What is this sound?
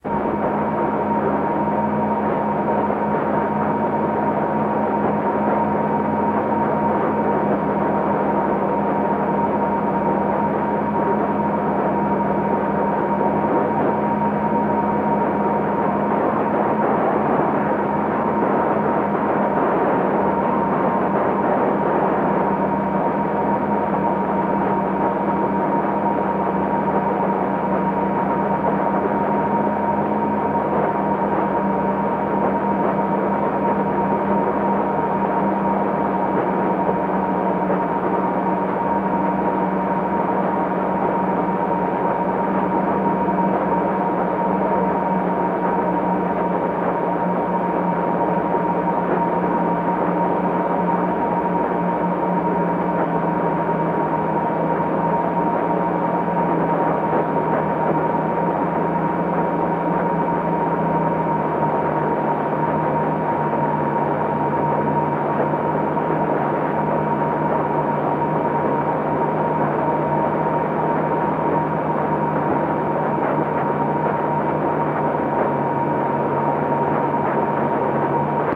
Various recordings of different data transmissions over shortwave or HF radio frequencies.